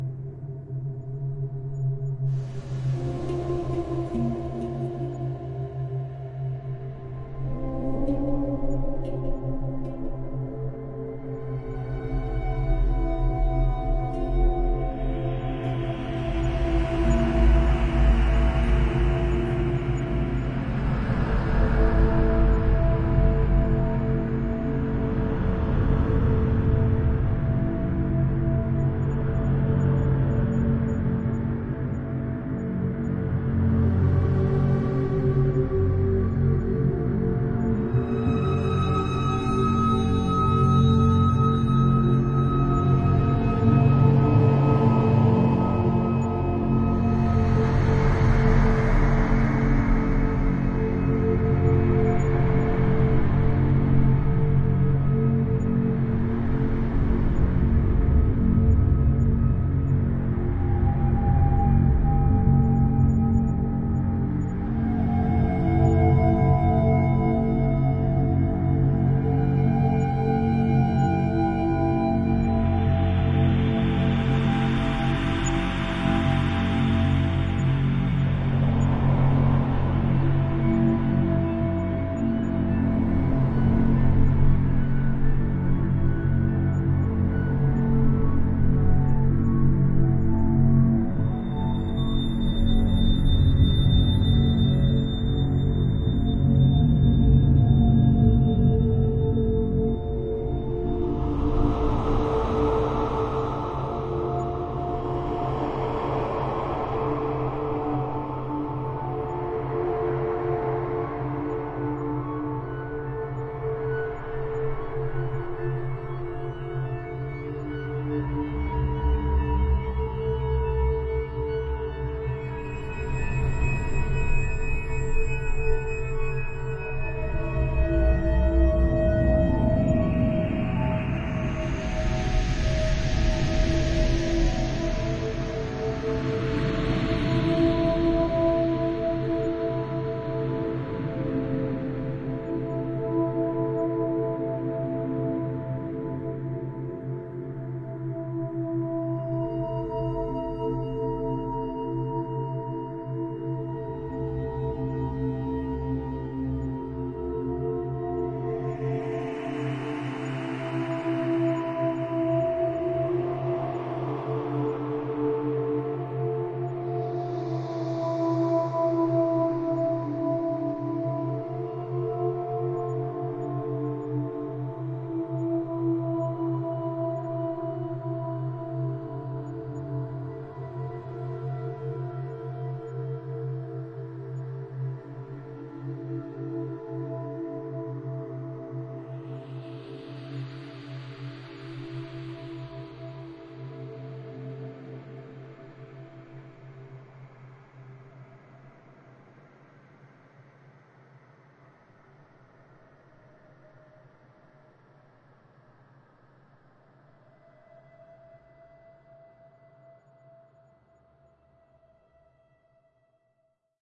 Stretch audio, analog synthesis, criation layers, hang-drum.